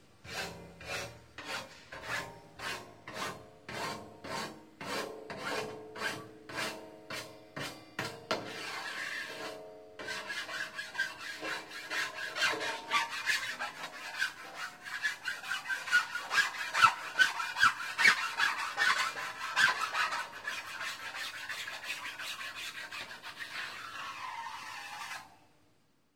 Filing Coarse Hand Aluminium 10mm thk
Hand filing 10mm thick aluminium panel